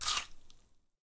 14/18 Apple related eating noises. Recorded in my studio with a matched pair of Rode NT5's in the XY configuration.